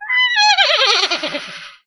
This is a recording of a trumpet whinney effect